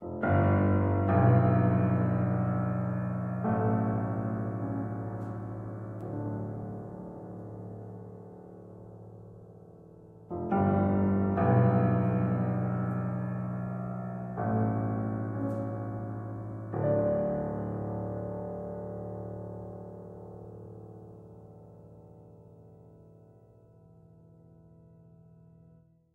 Sad piano
fortepiano, pianino